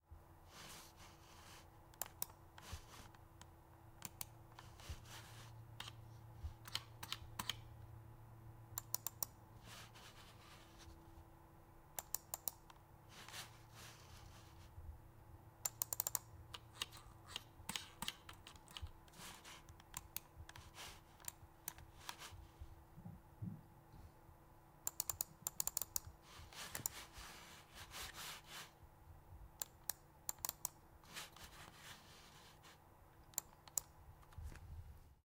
Me moving and clicking my mouse.